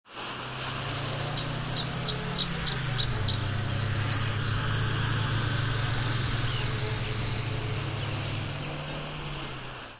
Binaural simulation of waterfront ambient. The polyphonic sound was created from a set of monaural sounds.